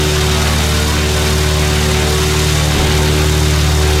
75 Hz Flying Plane Sound Loop
This sounds like it's the 2637 Screen...
It's a 2-bladed propeller seaplane at 2250 RPM!
Estimated Start Pitch: D2 (75.000 Hz)
I created the flying plane sound loop in Audacity, with white and pink noise added! The loop here runs exactly 4 seconds!
Added a 3675 Hz tone and more... click the sound sources link...
Normalized to 95% of maximum volume!
(t: 157.60-161.60)
And I created this sound myself!
Want a longer version? Apply the effect "Repeat..." and enter a number between 1 and 33528, or apply the effect "Echo..." with a delay time of exactly 4 seconds and a decay factor of 1 after adding enough silence to the end of this file!
Every time the sound loops, the light patterns change, as shown right here!
You can still use this sound!
64050, 64050Hz, 75, 75Hz, airplane, engine, loop, plane, prop, propeller, sample, seaplane